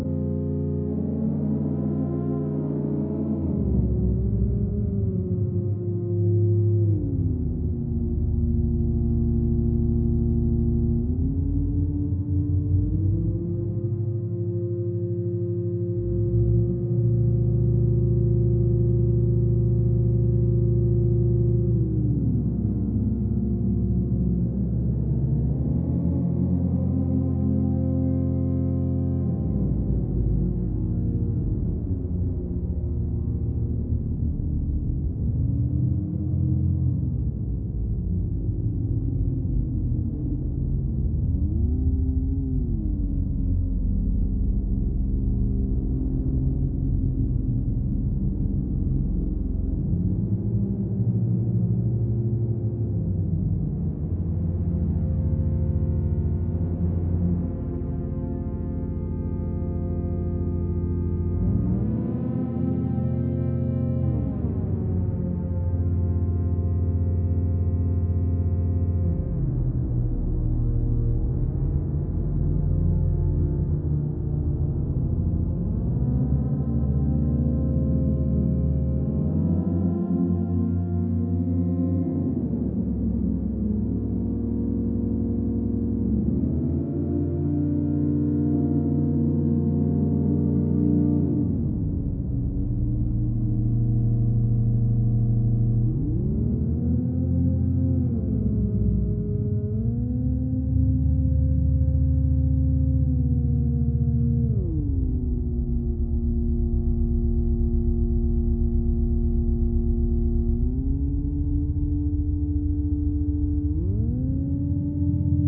sweet and pad sound, on a low key, processed at 120 bpm on Reaktor.